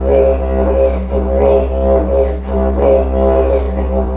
Mono version of a didgeridoo loop
This is a mono version of a sound taken from here:
Here is the original description:
The didgeridoo requires circular breathing, and it can create many different rhythms. It can take a while to learn and get a rhythm going and each time the song is played it is organic and unique. The bpm is unique and changes! This snippet works well at 115bpm and is two bars in length :D loop
didgeridoo, didjeridu, loop